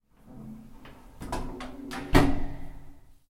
elevator stopping. recorded with zoom h4n